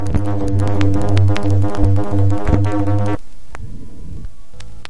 2-bar loop that starts with busy panning and ends with light hiss and clicks; done with Native Instruments Reaktor
2-bar, busy, clicks, glitch, hiss, loop, panning, rhythmic, sound-design